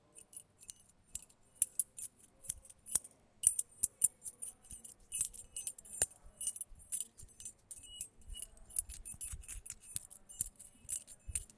keys rhythm
A student playing around with keys rhytmically at UPF Communication Campus in Barcelona.